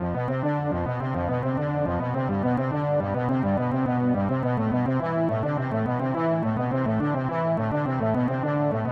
7080 vintage synth loop
nice sound loop from 70s - 80s synth wave
80, vintage, loops, 70s, analog, drum-synth, percussion, synth, s